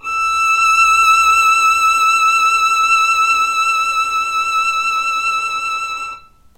violin arco vib E5
violin arco vibrato
vibrato, arco, violin